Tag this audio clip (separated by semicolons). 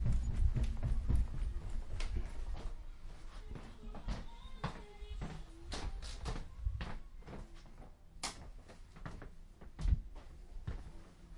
creaking; steps; walking; wodden-floor; feet; footsteps; wodden-stairs; up; stairs; running; floorboards